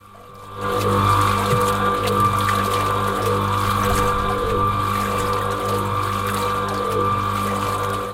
cement mixer full of water
All the sloshy joy of water in a cement mixer.
machine, field-recording, industrial